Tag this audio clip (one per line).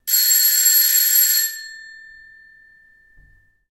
ring
door
metallic
bell
rings
ringing
doorbell